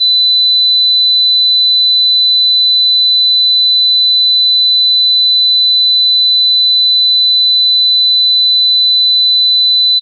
Set computer volume level at normal. Using headphones or your speakers, play each tone, gradually decreasing the volume until you cannot detect it. Note the volume setting (I know, this isn't easy if you don't have a graduated control, but you can make some arbitrary levels using whatever indicator you have on your OS).
Repeat with next tone. Try the test with headphones if you were using your speakers, or vice versa.
tone, sine-wave, hearing-test